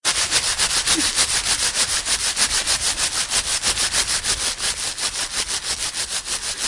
mySound LBFR maureen
Sounds from objects that are beloved to the participant pupils at La Binquenais the secondary school, Rennes. The source of the sounds has to be guessed.
cityrings, garbagebag, labinquenais, maureen, rennes, france